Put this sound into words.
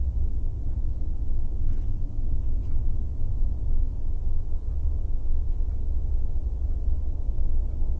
Hallway Ambience (Can Be Looped)
Loop-able Hallway Ambience. Enjoy!
Air, Industrial, Indoors, Tone, Room, Hallway, Ambience, Atmosphere, Office, Quiet, Looped